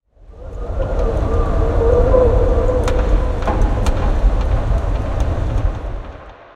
Wind and fire
Windy day in Tuscany, I recorded the blowing wind and the bouncing fireplace.
Raw recording with phone and editing with garageband
LPC